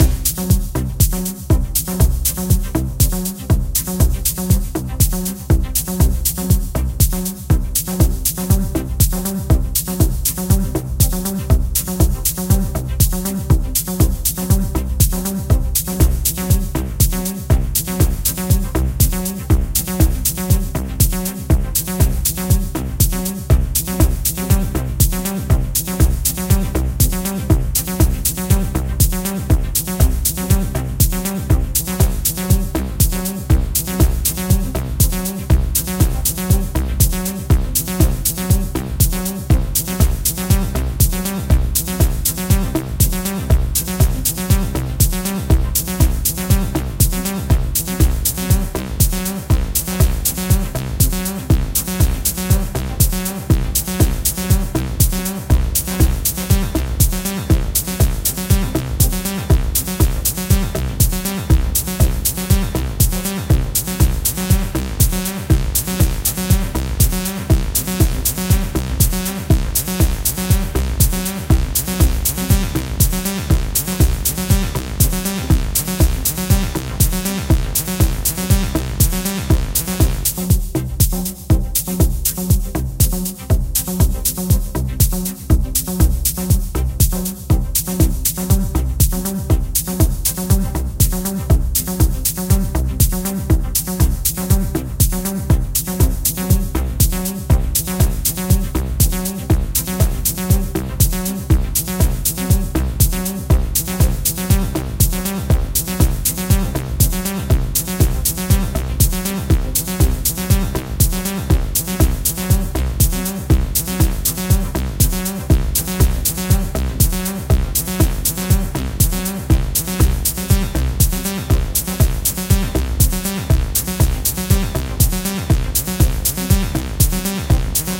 Kick and Acid Bass Loop.
Sylenth1 synth.
Drum, Bass, Stab, Kick, House, Electro, Loop, Open, Electric-Dance-Music, Synth, original, Hi-Hats